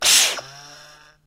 recorded from a Dyson vacuum cleaner